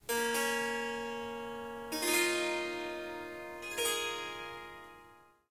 Harp Short 5
Melodic Snippets from recordings of me playing the Swar SanGam. This wonderful instrument is a combination of the Swarmandal and the Tampura. 15 harp strings and 4 Drone/Bass strings.
In these recordings I am only using the Swarmandal (Harp) part.
It is tuned to C sharp, but I have dropped the fourth note (F sharp) out of the scale.
There are four packs with lots of recordings in them, strums, plucks, short improvisations.
"Short melodic statements" are 1-2 bars. "Riffs" are 2-4 bars. "Melodies" are about 30 seconds and "Runs and Flutters" speaks for itself. There is recording of tuning up the Swarmandal in the melodies pack.
Ethnic, Harp, Indian, Melodic, Melody, Riff, Strings, Surmandal, Swarmandal, Swar-sangam, Swarsangam